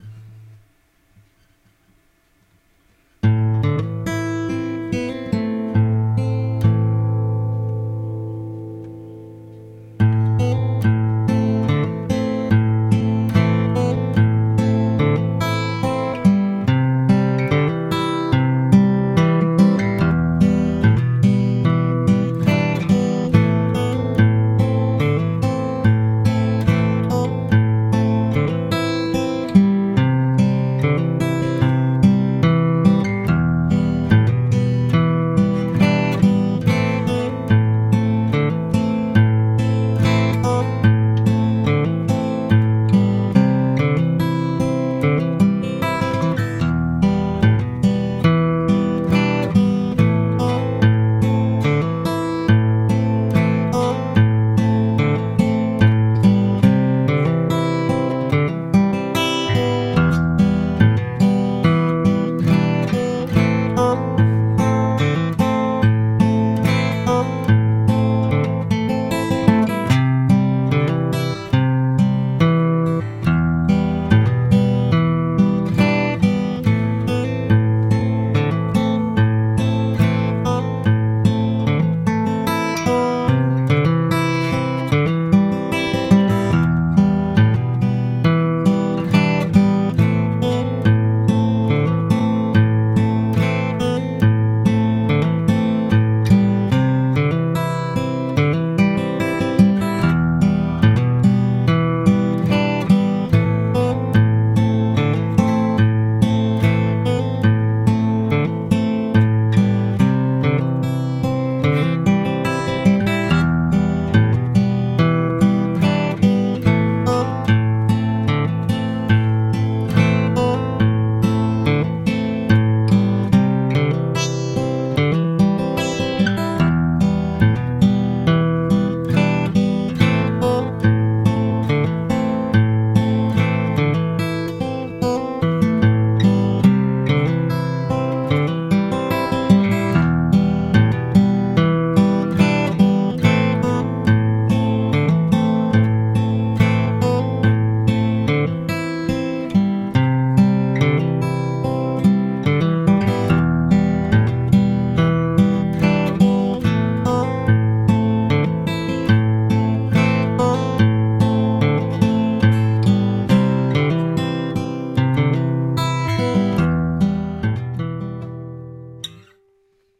Acoustic guitar Am C Em
This is simple sequence with Am C Em chords, bpm 72. Played on acoustic guitar. Recorded on mcirophone AKG perception 100. Уou can use it in your music projects, mixing in your trek or added bass or drums lines, or another different instruments. How your imagination will be can.
C, guitar, acoustic-guitar, song, Am, Em